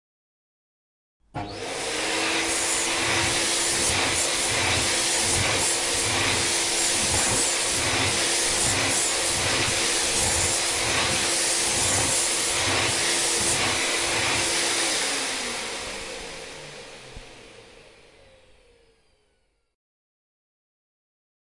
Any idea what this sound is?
Sound of household chores.